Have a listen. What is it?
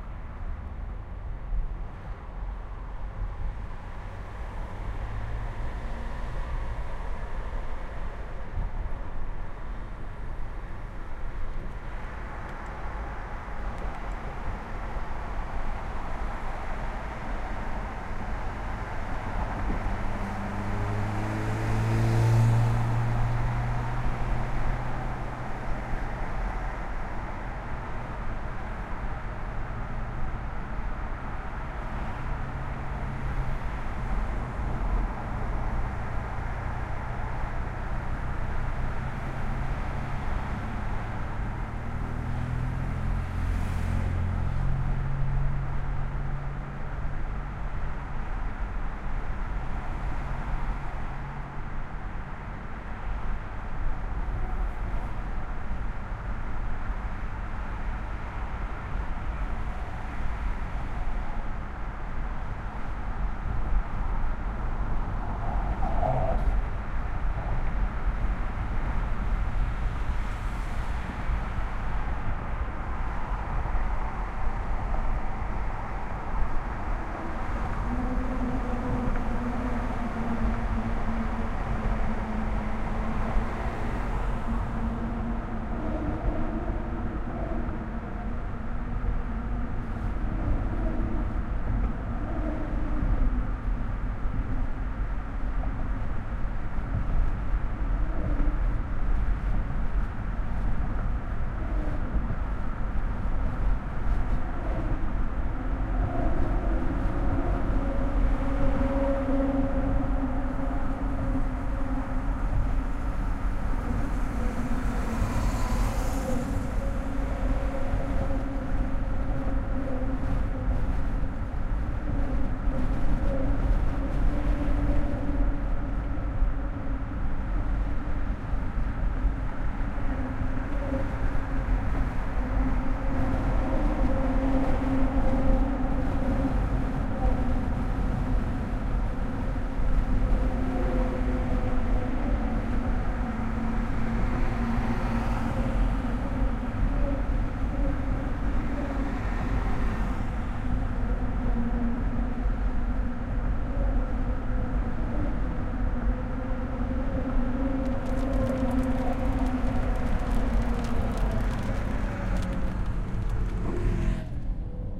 Field Recording done with my Zoom H4n with its internal mics.
Created in 2017.